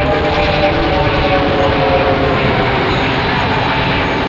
This is a weird screaming sound I made. It must be used for strictly for FNAF fan game jumpscares.